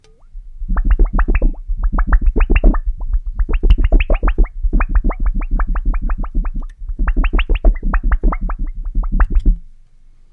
This is a blank CD wobbling then breaking.